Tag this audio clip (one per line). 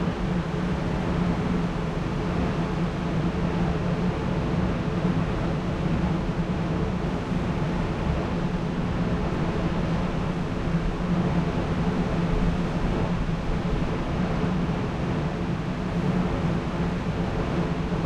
exhaust
vent
ext